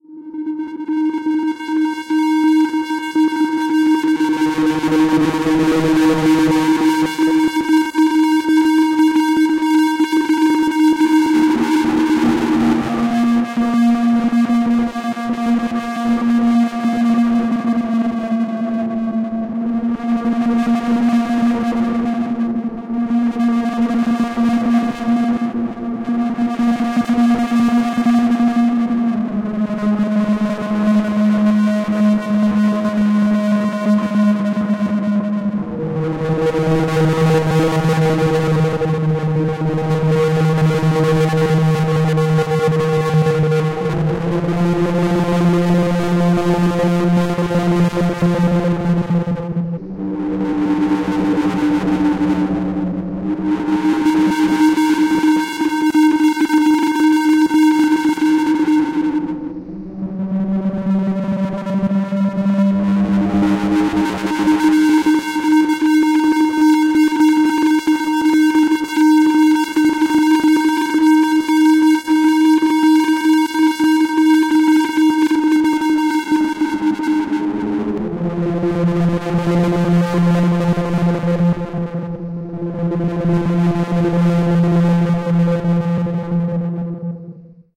This technological drone sound was made from what was originally a chaotic recorder sound file.